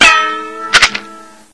Gourd guitar twang and a shake-shake. Recorded as 22khz
gourd, handmade, invented-instrument, poing